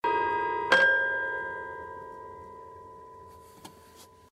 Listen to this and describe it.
horror sound
A sound I created with a piano
ambience, creepy, demon, devil, drama, eerie, evil, fear, fearful, ghost, ghostly, gothic, Halloween, haunted, hell, horror, nightmare, paranormal, phantom, scary, sign, sinister, spooky, thriller